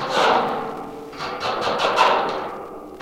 A few scrapes across the surface of a mesh basket.